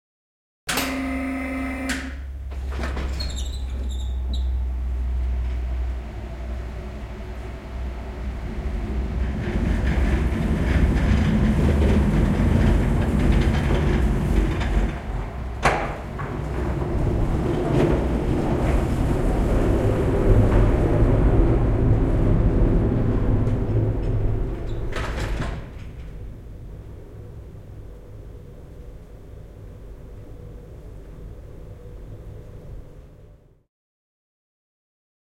Tuhkaus, arkku polttouuniin / Crematorium, coffin gliding into the cremator
Krematorio, arkku liukuu polttouuniin.
Paikka/Place: Suomi / Finland / Helsinki
Aika/Date: 30.09.1997